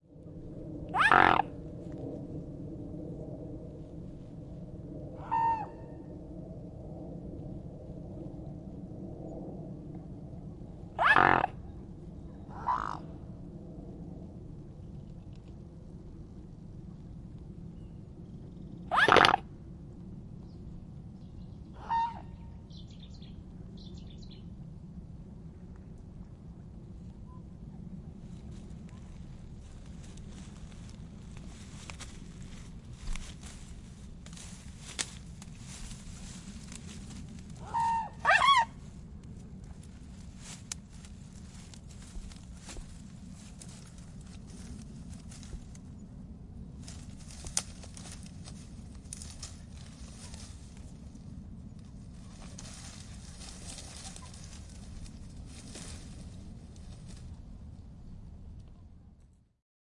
Swan Signet Call and Response Aeroplane Overhead(!)
The swans were fairly active again at the Newport Wetlands. Unfortunately the air traffic was ridiculous. Nice to get up close to a swan making noises though.